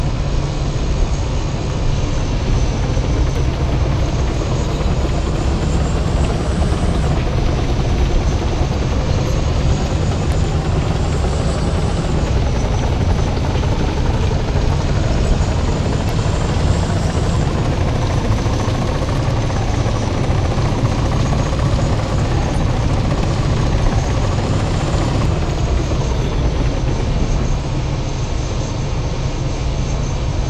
M1A2 Abrams Accelerating
This is an M1 Abrams tank acceleration effect. Created using personally recorded jet engine and tank track sounds mixed and edited in Goldwave Sound Editor.
Abrams, Battle, M1, M1A2, M1A2-Abrams, M1-Abrams, Tank, War